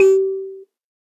SanzAnais 67 G3 bz
a sanza (or kalimba) multisampled with tiny metallic pieces that produce buzzs
african, kalimba, percussion, sanza